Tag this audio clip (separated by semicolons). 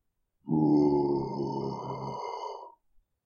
Idle; Undead; Walk; Zombie